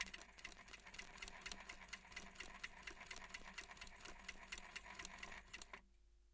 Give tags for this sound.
coudre
industrial
machine
machinery
POWER

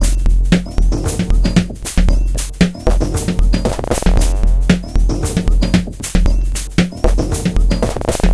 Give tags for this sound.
drumloop
loop
electronic
weird
dance
115bpm

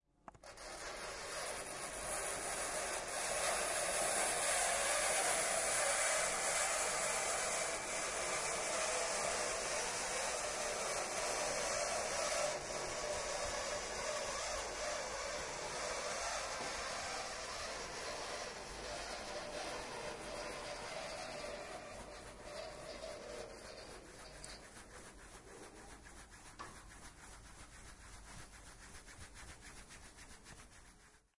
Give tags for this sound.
workshop
field-recording
bruitage

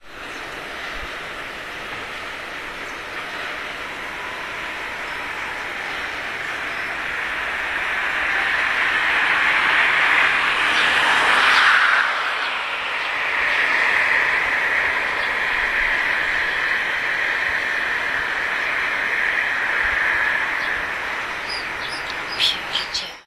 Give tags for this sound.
street
poland
field-recording
drone
noise
sobieszow
car

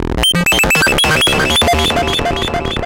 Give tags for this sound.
loops
circuit-bent
distortion
noise-loops
glitch-loops
noisy
glitch
distorted
noise
acid

